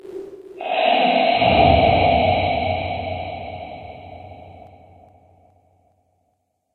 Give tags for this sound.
ambient
pain
horror
scary
creepy

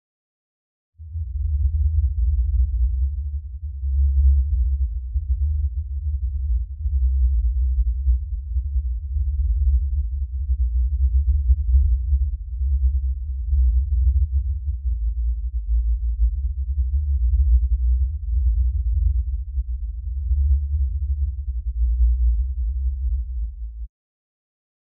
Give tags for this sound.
bass
rumble
rumbling
shaking

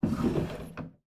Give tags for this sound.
open
wood
drawer
tascam
dr05
wooden
chamber
desk

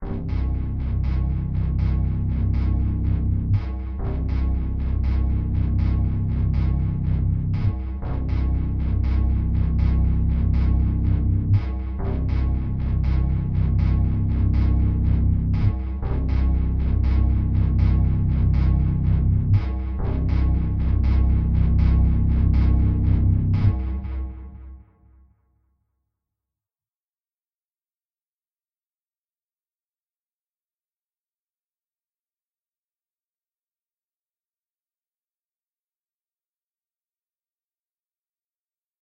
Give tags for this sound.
scary
suspense
SUSPENSEFUL
tension
thriller

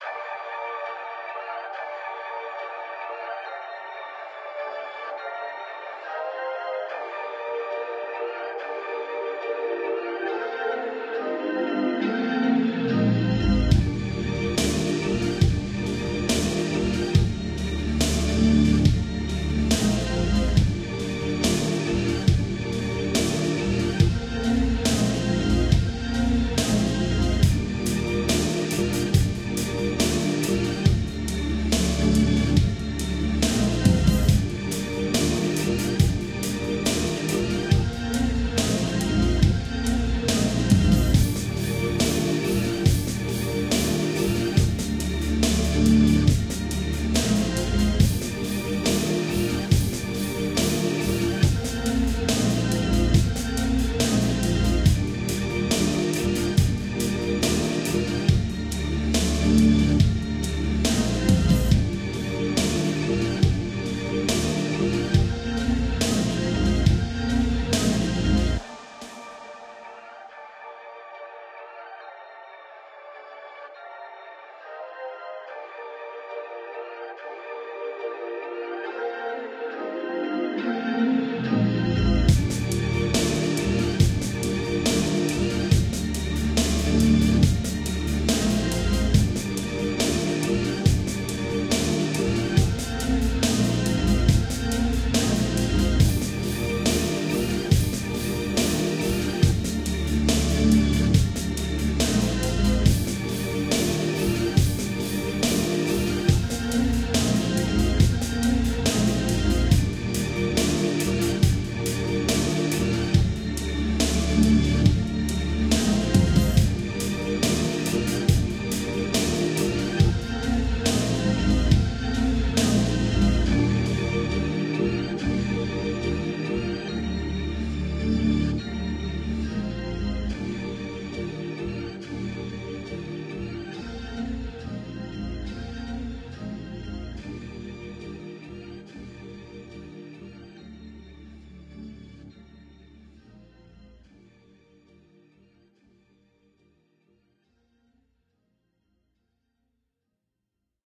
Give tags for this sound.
beat
beats
chill
drum-loop
funky
groove
groovy
hip
hip-hop
lo-fi
lofi
loop
loops
music
percs
percussion-loop
song
soul
warm

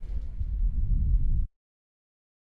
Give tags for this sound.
owi
thunder
lighting
weather
thunderstorm
rain
lightning
nature
sound
storm
field-recording
thunder-storm

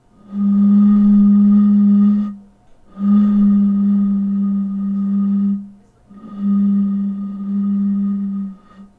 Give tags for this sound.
blown
bottle
sound
wind

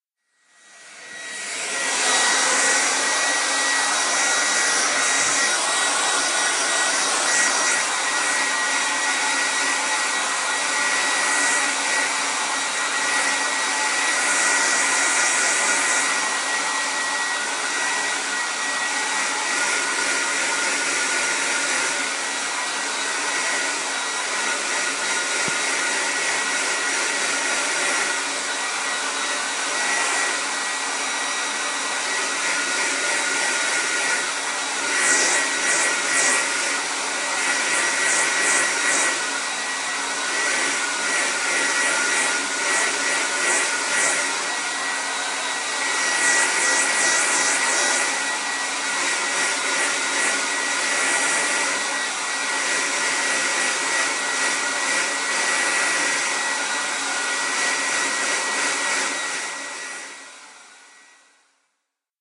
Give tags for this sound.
hair
blowdryer
barbershop
blowdry
barber-shop
blow-dryer
haircut
blow-dry
barber

